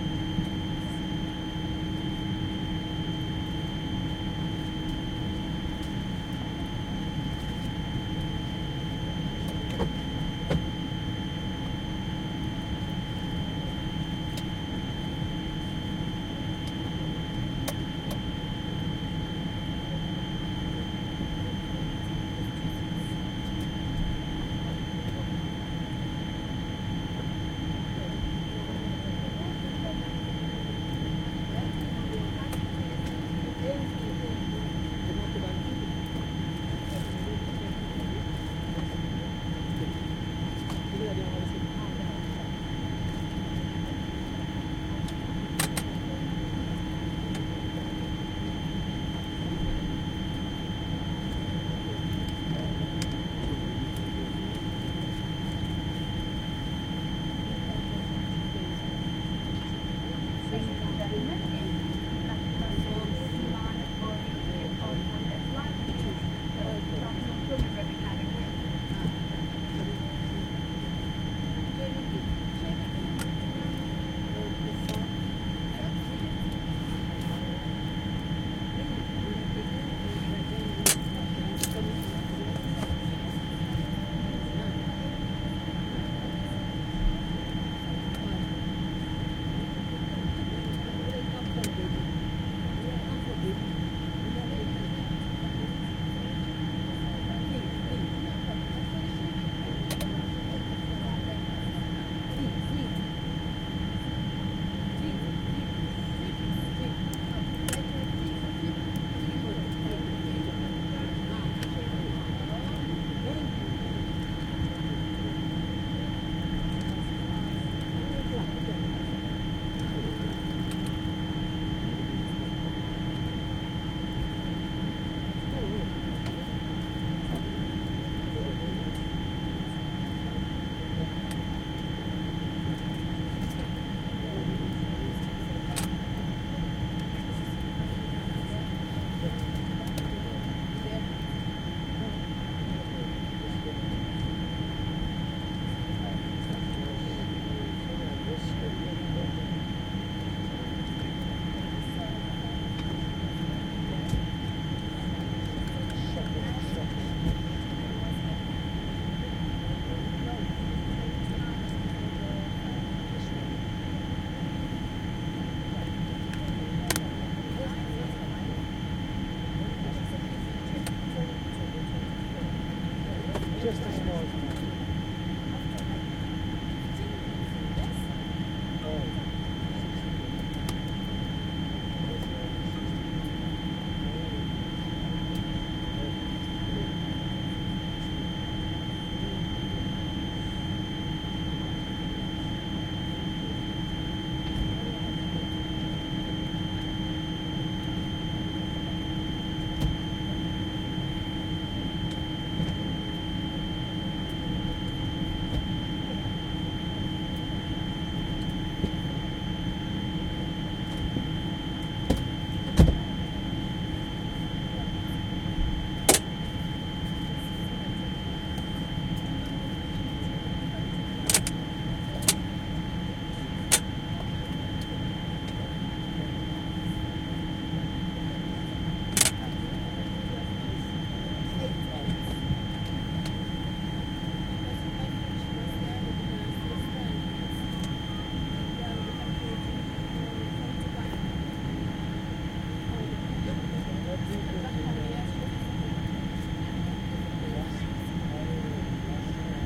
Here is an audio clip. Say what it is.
-09 SE 4ch ATMO flight dbx-del before start with close sounds, plane
Recording inside of (probably) Airbus 380 during beginning of a flight from Warsaw to Dubai, 30.12.2016. Constant drone, noise and beeping sound. Towards the end we hear people moving around, opening lockers etc.
4 channel recording made with Zoom H2n